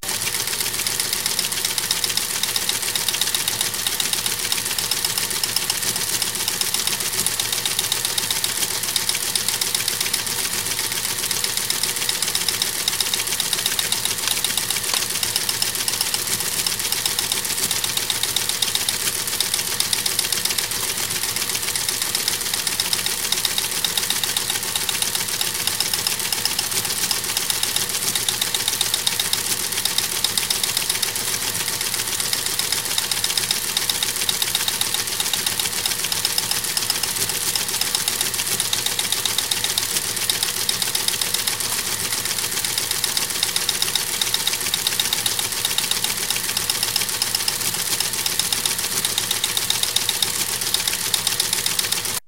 teletype fast speed
This is the sound of a teletype I recorded at a fast speed. There is no fade in or out since I wanted it easy to loop behind news voice-overs.
I'm always eager to hear new creations!
news, office, OSD, teletype, typing